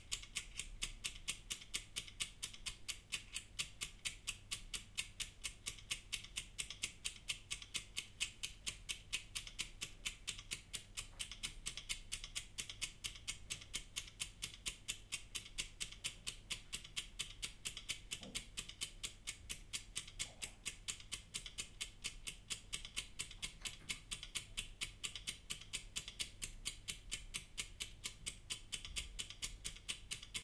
Audio-Technica AT3031 BeachTek DXA-10
To test some microphones and preamps I used the following setup: A Sony PCM-D50 recorder and an egg timer. Distance timer to microphones: 30 cm or 1 ft. In the title of the track it says, which microphones and which preamp were used.
clock, microphone, microphones, test